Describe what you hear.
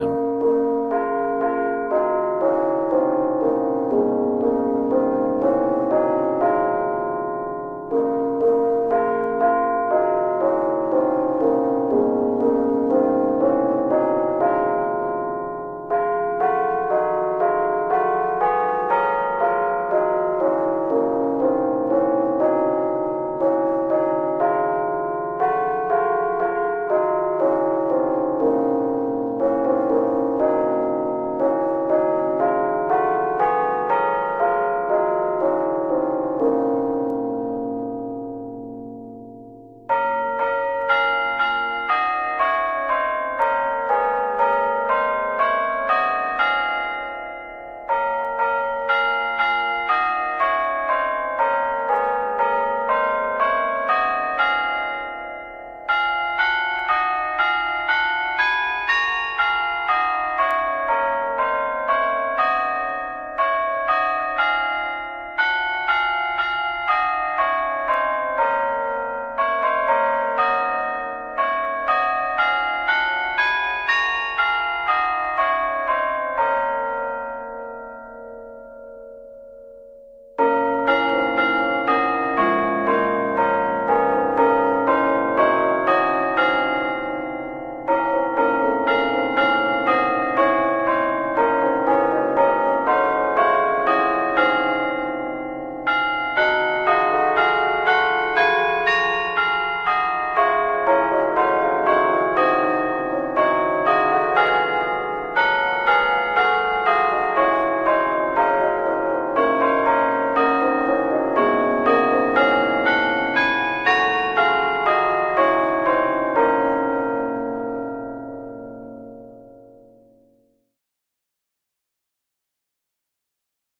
Here is the second carillon recording in the Carillon Bells pack. God Rest Ye Merry Gentlemen. Good holiday song. Hope you enjoy this as well.